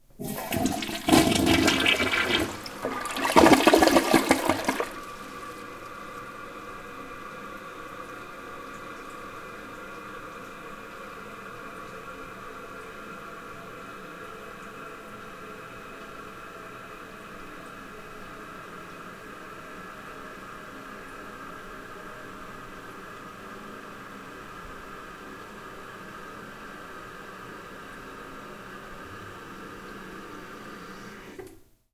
A recording of my toilet flushing.
pee, flush, restroom, flushing, water, washroom, poop, toilet, bathroom, drain, WC